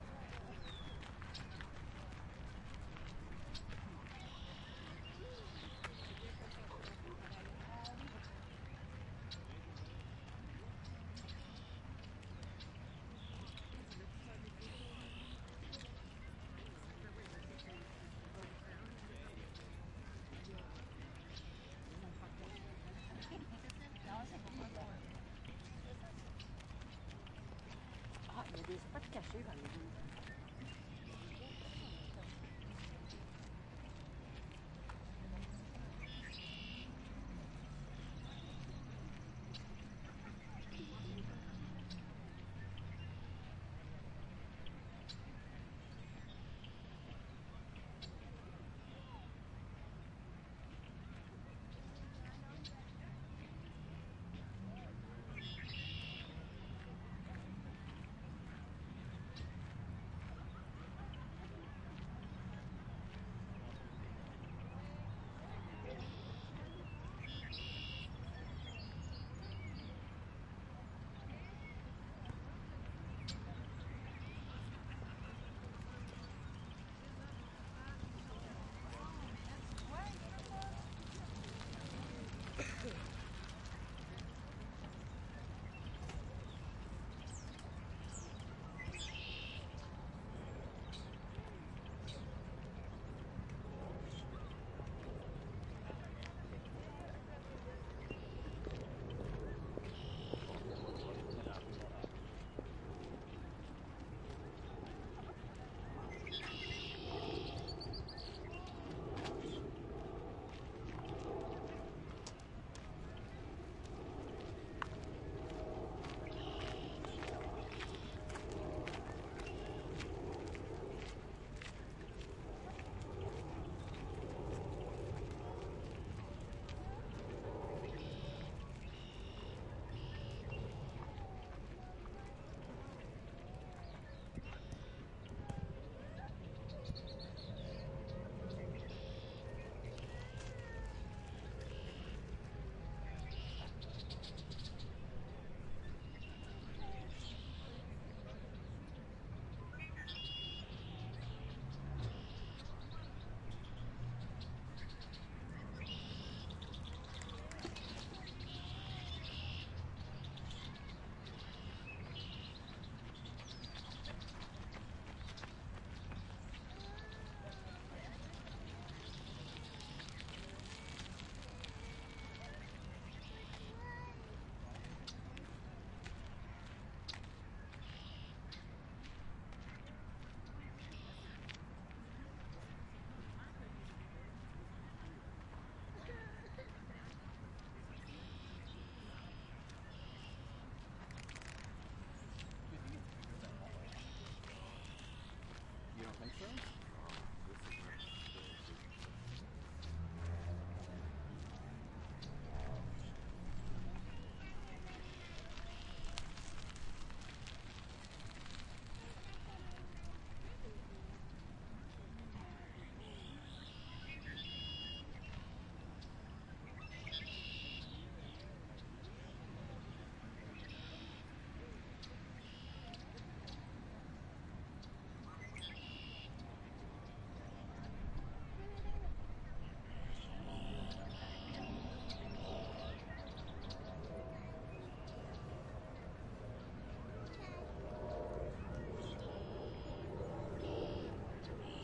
Jarry Park - Path
Jarry Park, May 2018.
Running path.
al, ambience, ambient, birds, city, field-recording, foot, footsteps, gravel, ground, Montr, park, Quebec, runners, step, steps, walking